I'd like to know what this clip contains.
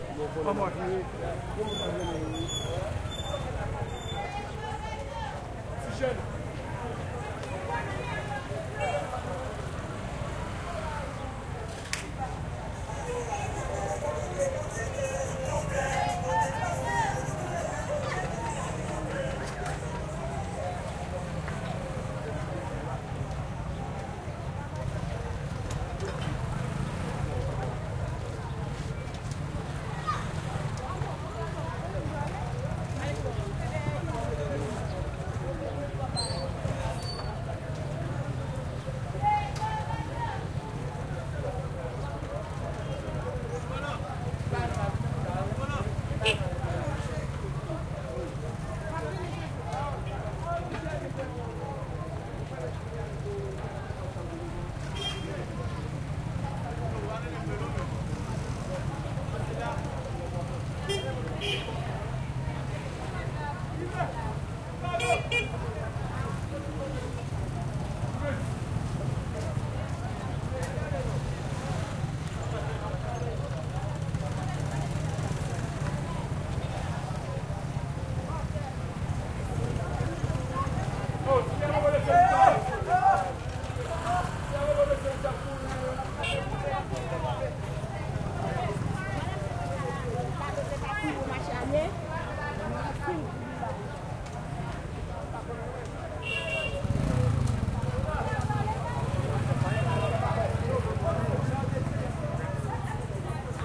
street Haiti active could be market steps people3 +motorcycle engines idle
street Haiti active could be market steps people +motorcycle engines idle